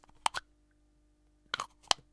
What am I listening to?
Open and close small plastic object
Opening and closing a small plastic object.
{"fr":"Ouvrir un petit objet en plastique 1","desc":"Ouverture et fermeture d'un petit objet en plastique.","tags":"ouvrir ouverture plastique boite objet manipuler fermer"}
close, closing, manipulation, object, open, opening, plastic, small